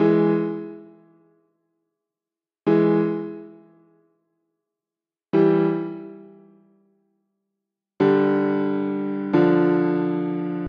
I hope this is usable. Made in FL Studio 12.
Tempo: 90bpm.
hip-hop, piano, 90bpm